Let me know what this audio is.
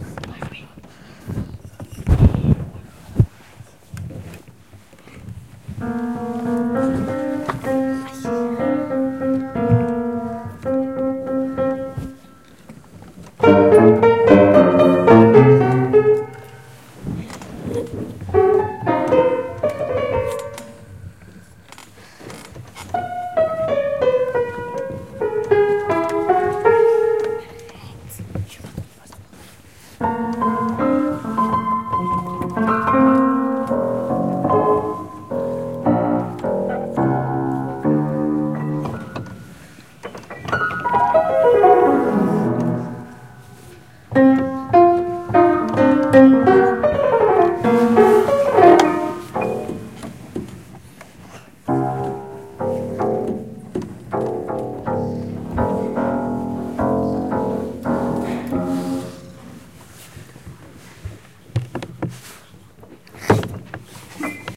OM-FR-piano
Ecole Olivier Métra, Paris. Field recordings made within the school grounds. Someone plays the out of tune piano.
France, Paris, recordings, school